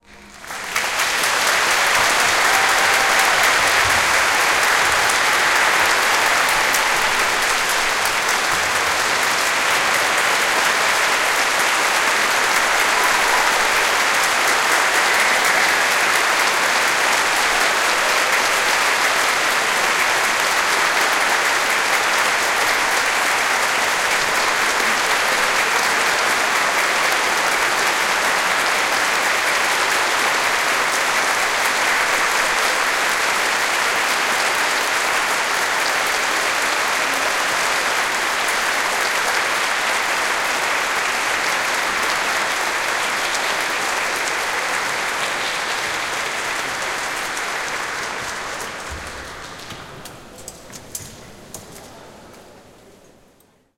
Nearly one minute of applause without talking or other sounds, although there is some muted cheering. The audience of about 750 was recorded inside the sanctuary of a large church following a Christmas-themed concert in December 2018. Microphones included were two AKG C 414 B-ULS multi-pattern large diaphragm condenser mics placed far right and far left, one RØDE NT4 stereo condenser mic in the middle, and a Sony PCM-D1 field recorder at the far back of the venue.
applauding, applause, audience, clap, clapping, concert, crowd